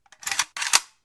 ak47 chamber round
Chambering round in AK sporter rifle recorded with B1 mic through MIC200 preamp. Mastered in cool edit 96.
assault, sound, 7, 47, 62, gun, load, round